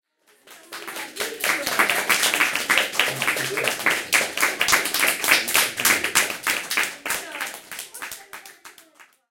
Sound of applause at the end of a representation. Sound recorded with a ZOOM H4N Pro.
Son d’applaudissements à la fin d’une représentation. Son enregistré avec un ZOOM H4N Pro.